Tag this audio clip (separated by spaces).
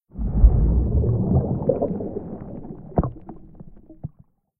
under-water bubbles ocean